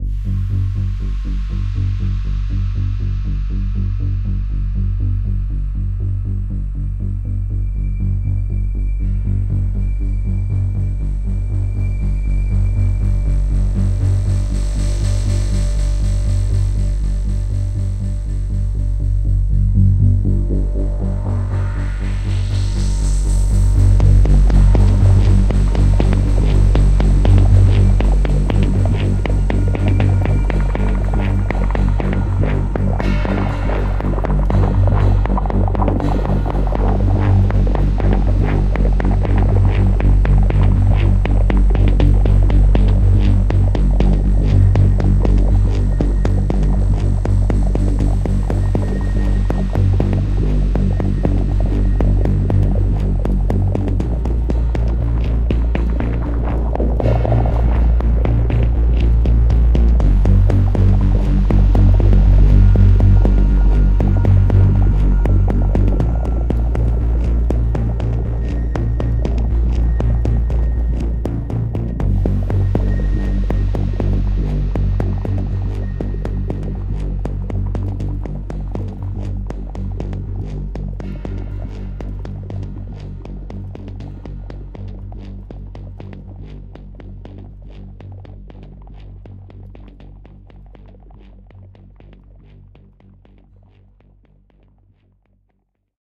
Cinematic Pulsing Atmosphere
So here's an interesting rising bass pulse sound effect that can be used in a variety of situations - very tense and dynamic
ambient, atmosphere, background, bass, cinematic, dangerous, drama, dramatic, drone, effect, film, intense, movie, pulse, pulsing, rise, rising, sound, soundscape, spooky, suspense, thrill